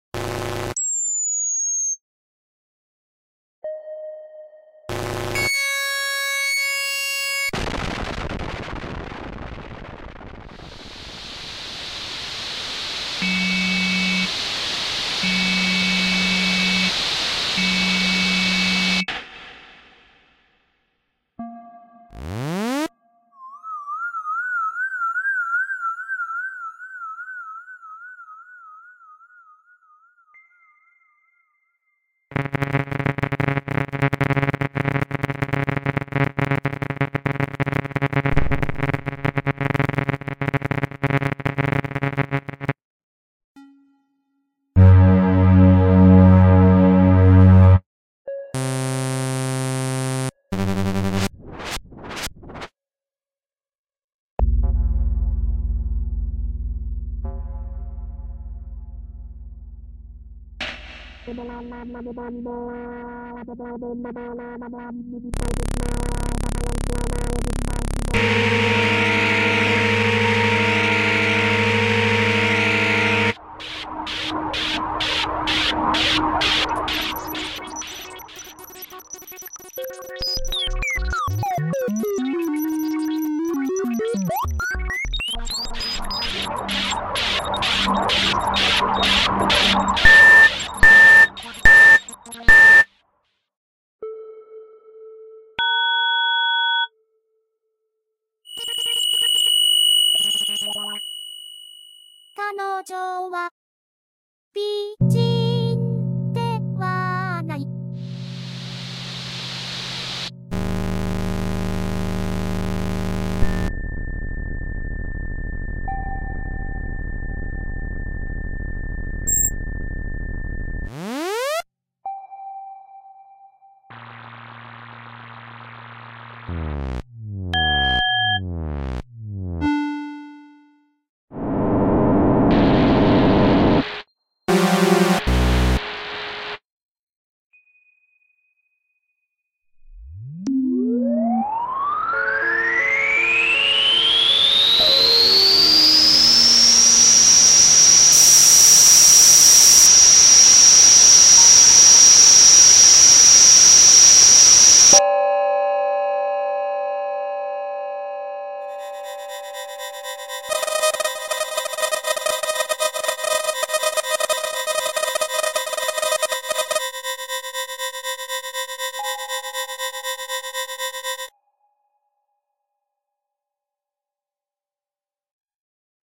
I made this with an old-fashioned sysntheseizer.